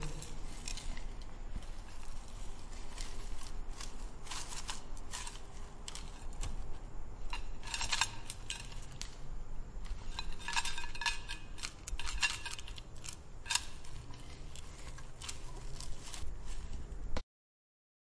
Loose leaf tea in a tin container